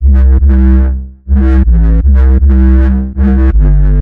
DeepBassloop1 LC 120bpm
Electronic Bass loop
bass, electronic, loop